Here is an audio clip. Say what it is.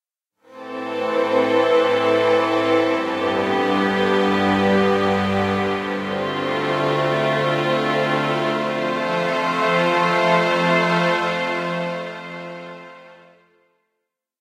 made with vst instruments
ambience, ambient, atmosphere, background, background-sound, cinematic, dark, deep, drama, dramatic, drone, film, hollywood, horror, mood, movie, music, pad, scary, sci-fi, soundscape, space, spooky, suspense, thiller, thrill, trailer